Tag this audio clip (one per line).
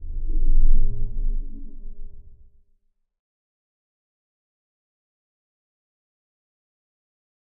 Sound
Space
Sci-Fi
Game-Creation
Door
Spaceship
Ambient
SciFi
Outer-Space
Effect